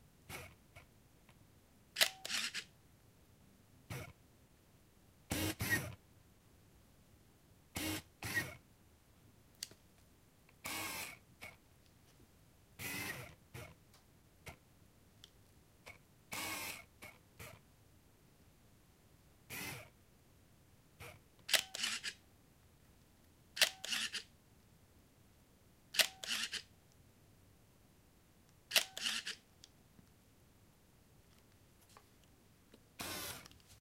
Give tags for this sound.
transport; film; auto; h2; shutter; release; minolta; focus; focussing; photo; s1; camera

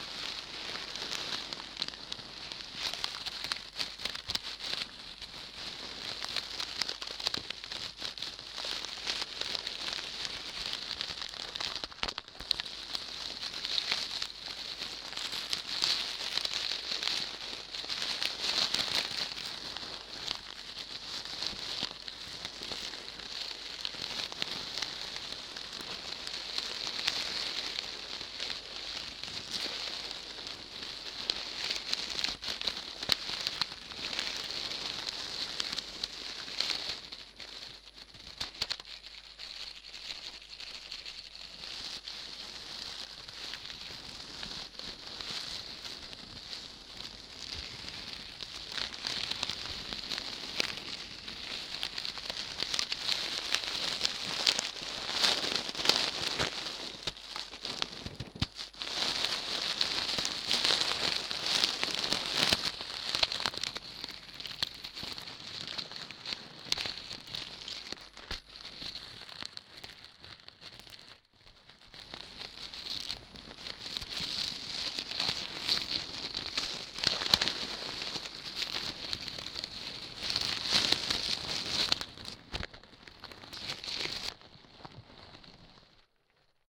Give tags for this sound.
texture; pack; mic; noise; piezzzo; Stainless-Steel-Scrub-Pad

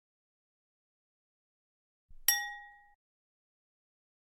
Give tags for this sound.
Clink; CZ; Czech; Glass; Panska

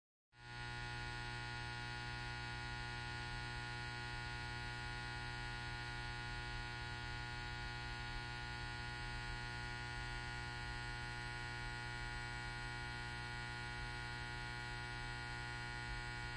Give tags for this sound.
electricity; buzz; light

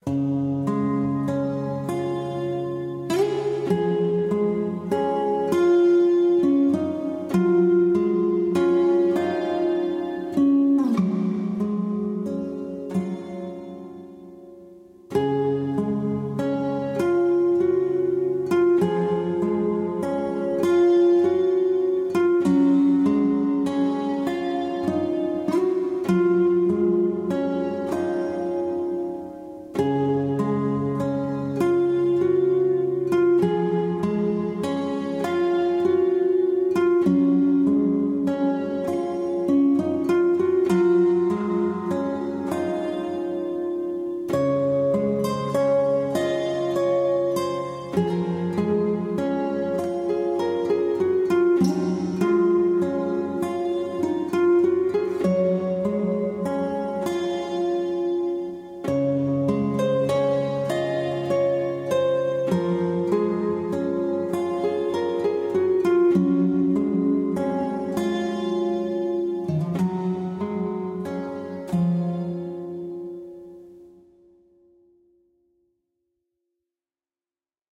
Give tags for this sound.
Emotional; Guitar; Music; Sad

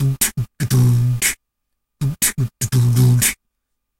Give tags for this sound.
120-bpm; bass; bassdrum; beatbox; boom; boomy; Dare-19; kick; loop; noise-gate; rhythm

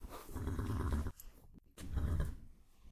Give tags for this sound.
barn; nicker; pony; horse; farm; whinny

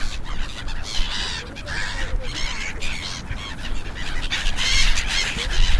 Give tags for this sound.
animals; river; water

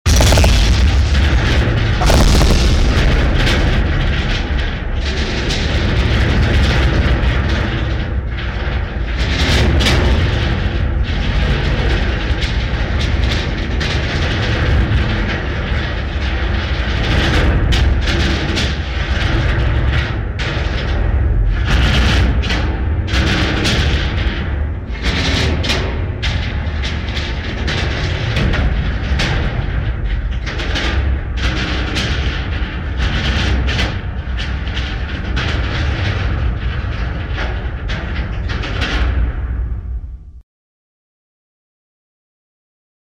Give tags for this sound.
Bomb; boom; Detonation; explode; Explosion; HD; huge; Nuclear

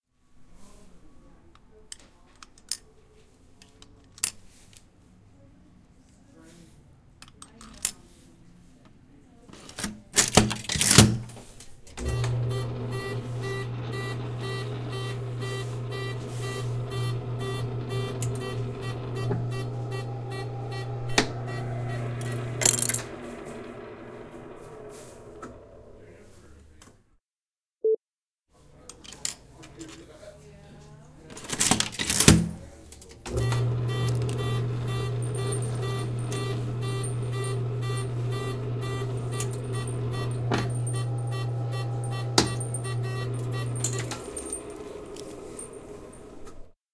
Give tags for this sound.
AudioDramaHub; foley; field-recording; ambience; penny-flattening-machine; gears; penny-squasher; machine; quarters; audiodrama; grinding; change; servo

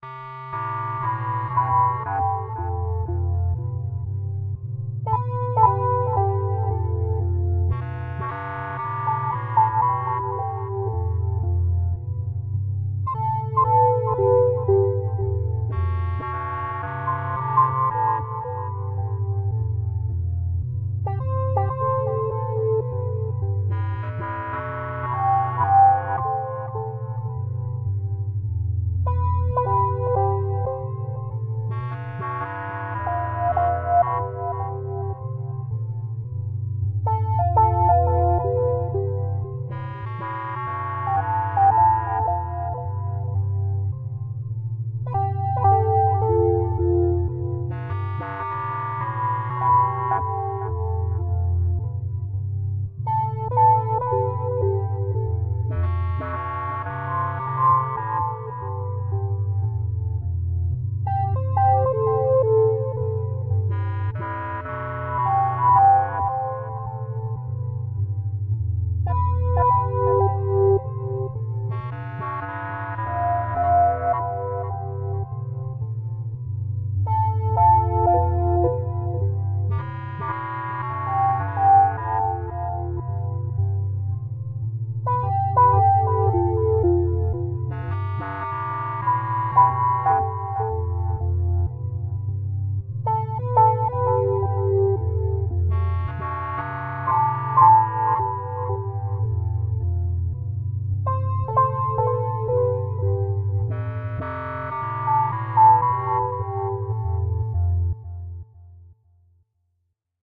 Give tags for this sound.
sequence; synth

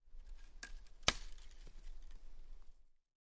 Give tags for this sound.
ambience; crackle; field-recording; forest; Nature; snap; snapping; tree; trees; twig; twigs

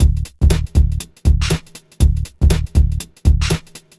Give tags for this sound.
120bpm; bass; bassline; beat; break; breakbeat; drum; funk; hip; hop; loop

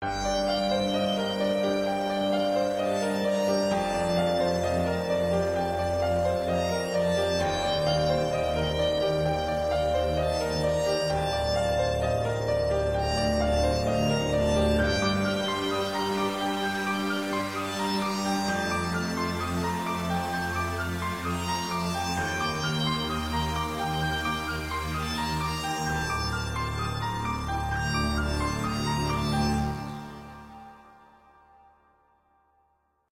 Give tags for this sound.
Piano; Cinematic; Sad

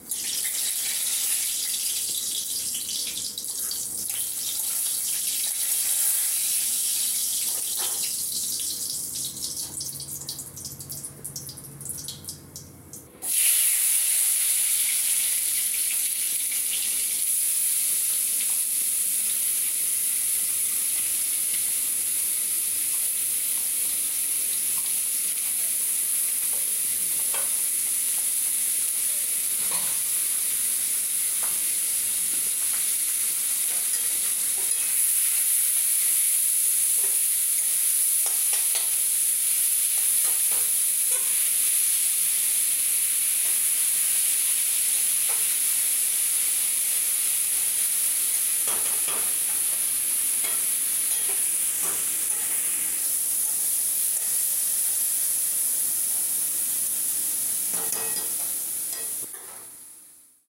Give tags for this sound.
bengalicoocking; coocking

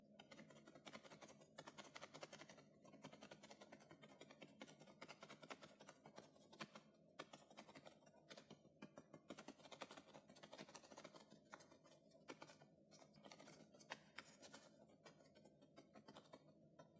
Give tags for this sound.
flutter; wings; insect

